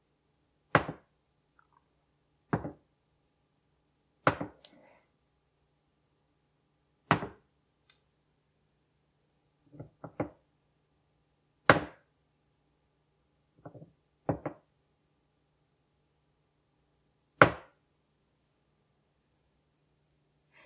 Setting Down Cup
Putting down a ceramic cup on a hard surface.